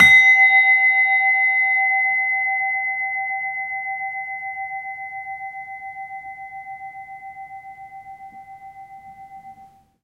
Medieval Bell G3
Medieval bell set built by Nemky & Metzler in Germany. In the middle ages the bells played with a hammer were called a cymbala.
Recorded with Zoom H2.
medieval, bell